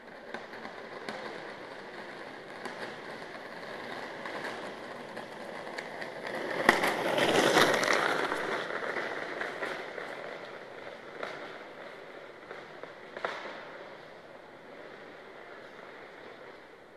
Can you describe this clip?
JBF Buggy Race 3
A buggy passes by in a race, longer sequence.
race, wheel, outdoors, buggy, field-recording, cart